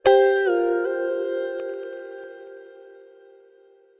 ae guitarBend
5th, bend, fith, guitar, ptich-bend